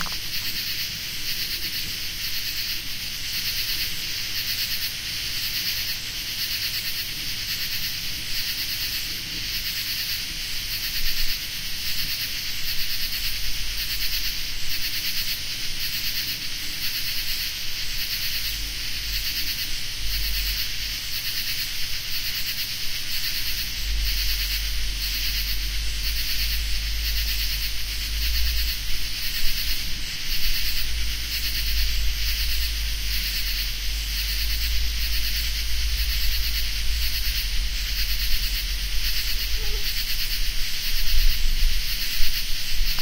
Arrow Rock Nocturne 03
Ambient recording of insects at night in Arrow Rock, Missouri, USA. Recorded July 2, 2012 using a Sony PCM-D50 recorder with built-in stereo mics. The insects are very loud through the night. The taller the trees, the thicker they seem to cluster. This was taken near a large tree on the road.
wikiGong, built-in-mic, insects, field-recording, Sony, nocturnal, PCM-D50, Arrow-Rock, microphone